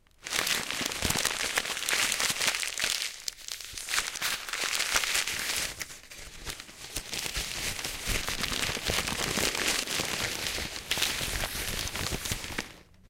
rustle.paper 3
recordings of various rustling sounds with a stereo Audio Technica 853A
paper, rustle, scratch, tear, cruble, noise, rip